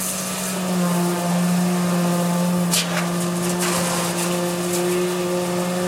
Vibrator in concrete
vibrator, concrete, construction-site, bizzing